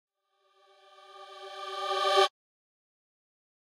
fadein choir v2
A simple EQ'ed Sytrus choir fading in.
space, fade, voice, choir, processed